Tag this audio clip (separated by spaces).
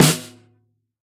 velocity drum multisample 1-shot